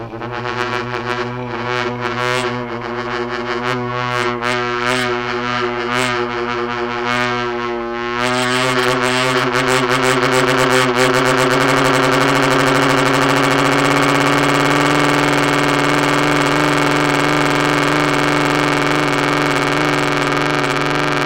interference is a interesting phenomenon. I blow a bass tone and add my own voice, First I adjust my voice to same wavelength as the instrument, then I change my voice linearily, and you can follow how you get a 3rd tone, which is either a+b or a-b.
frequency, interference, sound